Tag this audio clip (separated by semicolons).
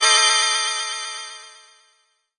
chord; ppg; organ; dissonant; multisample